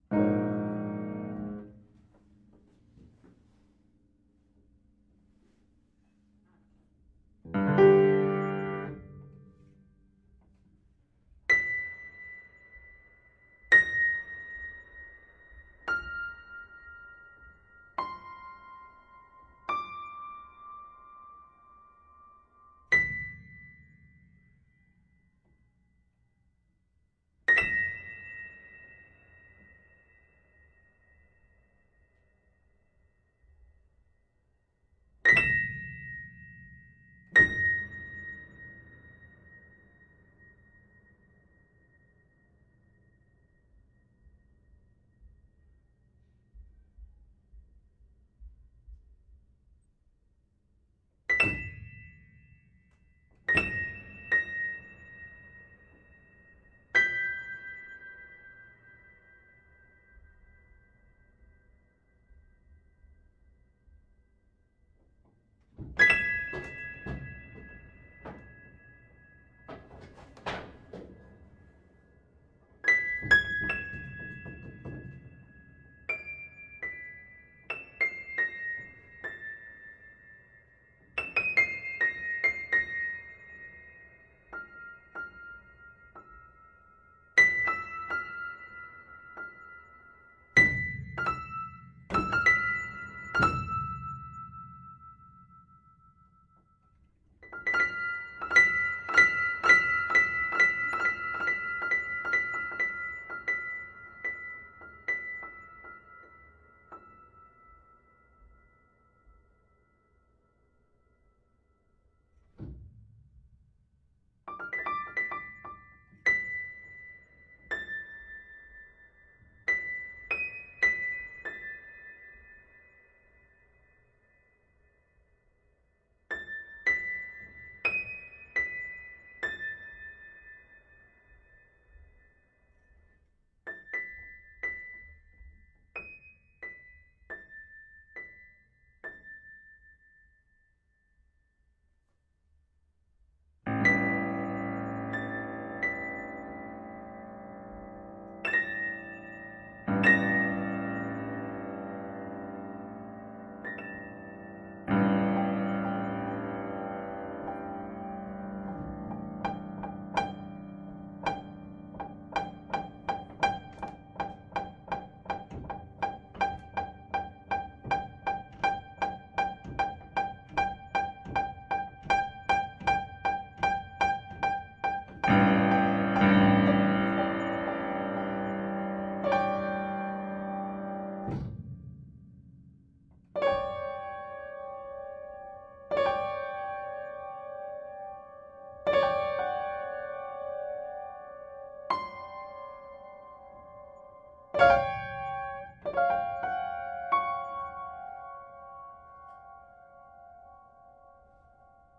Upright Piano Dark Random 4
Playing around trying to make dark atmospheres with an upright piano. Recorded with RODE NT4 XY-stereo microphone going into MOTU Ultralite MK3.